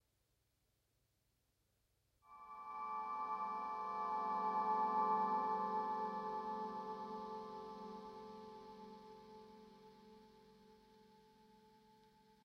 A simple fade in using my volume knob.
Gear used:
Vox ToneLab SE, Ibanez UV777 packed with Seymour Duncan SH, Tascam DR-05
harmonics, scale, delay, tascam, clean, dr-05, electric, vox, ibanez, C, reverb, seymour, sh, duncan, tonelab, se, compressor, Lydian, uv777, guitar